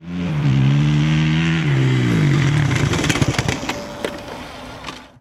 motorcycle dirt bike motocross pull up fast smooth cool kick stand down